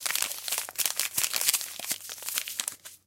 Crumpling paper
crumpling, foley